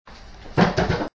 2 defend, and one attack hit in a wing chun wooden dummy.
3, hit, woodendummy